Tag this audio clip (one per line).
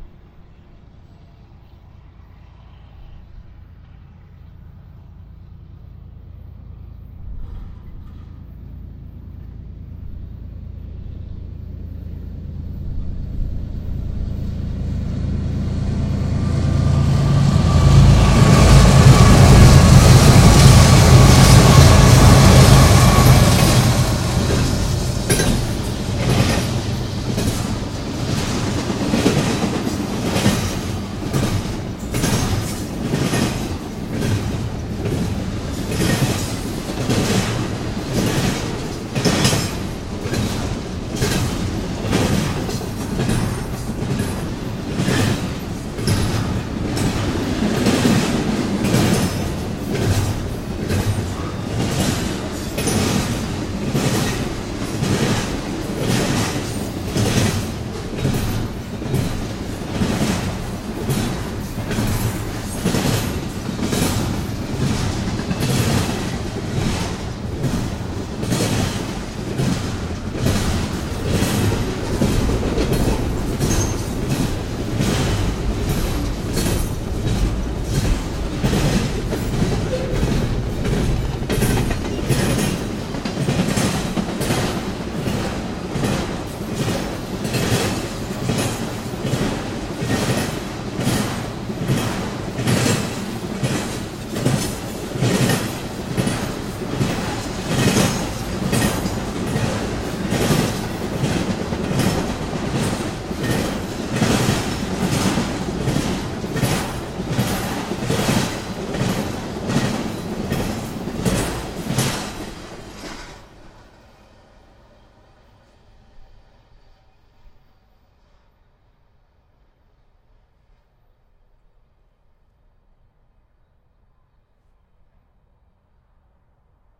field
recording
Train